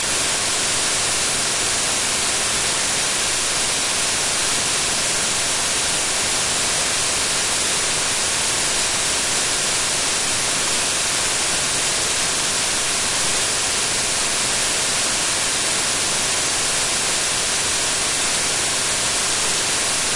20s of hiss
level it much lower to maybe between -40dBFS and -80dBFS and you will have a nice dub or tape noise.
dirt, hiss, hum, noise, pink, reel, reel2reel, tape, vinyl, white